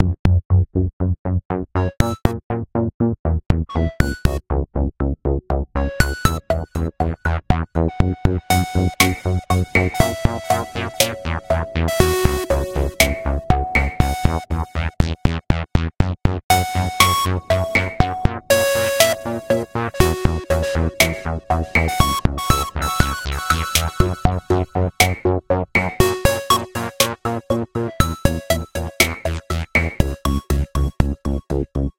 Mediso Vate
60bpm, Gb, mixolydian